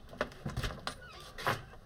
Door Opens Fast
Heavy door opened fast.